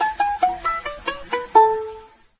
Looped elements from raw recording of doodling on a violin with a noisy laptop and cool edit 96. Plucked descending scale.
violin, scale, pizzicato, loop, plucked, noisy, descending